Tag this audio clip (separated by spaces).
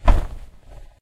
Action Battle Fight Foley War